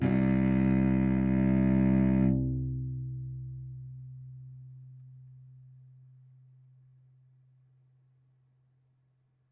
Bowed note on cello